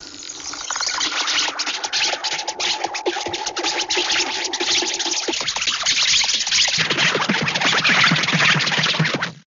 big bug bent